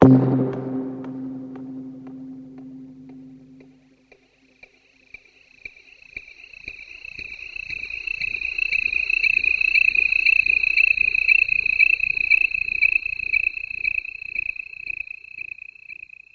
Yamaha PSS-370 with Effects - 01
Recordings of a Yamaha PSS-370 keyboard with built-in FM-synthesizer
Yamaha, PSS-370, FM-synthesizer, Keyboard